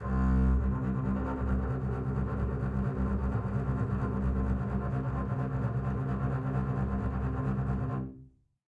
One-shot from Versilian Studios Chamber Orchestra 2: Community Edition sampling project.
Instrument family: Strings
Instrument: Solo Contrabass
Articulation: tremolo
Note: C2
Midi note: 36
Midi velocity (center): 95
Microphone: 2x Rode NT1-A spaced pair, 1 AKG D112 close
Performer: Brittany Karlson
c2, contrabass, midi-note-36, midi-velocity-95, multisample, single-note, solo-contrabass, strings, tremolo, vsco-2